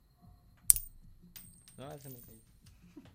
moneda siendo golpeada
sonando, moneda, golpe